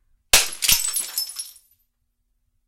Bottle Smash
A beer bottle being smashed.
bottle, smash, glass, break